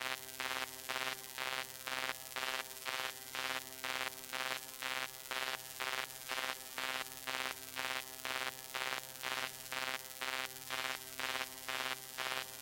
Brig Alarm Engaged
Electrical Alarm Buzzing, dry (small verb), crunchy noise, alarm beeping, old school sounding sci-fi alarm for sound effects, Spacecraft alert engaged in main terminal, Repetitive :
Sound created on Mother-32 and mild effects, recorded on Zoom H6
( no post-processing )
This sound is part of the Intercosmic Textures pack
Sounds and profile created and managed by Anon
Alarm, Beep, Buzz, Crunchy, Electric, Noise, Panic, Sci-Fi, Sound-Effect, Space, Two-Tone